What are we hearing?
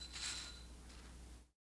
Tape Misc 2
Lo-fi tape samples at your disposal.